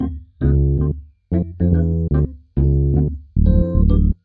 funkloop113bpm
funky riff, recorded on a real rhodes. i also used a phaser.
rhodes, funk